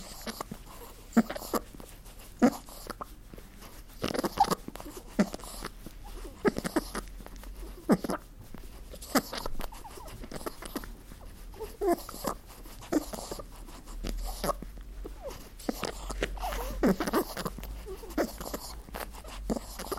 bunny right ear
Bunny has his right ear stroked and purrs.
gurgle, purr, rabbit, snuffles, wheeze